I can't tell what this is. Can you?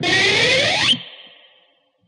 Dist PickSlideup
A three string quick pick slide up towards the pickup.
miscellaneous, distortion